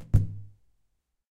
Percussive sounds made with a balloon.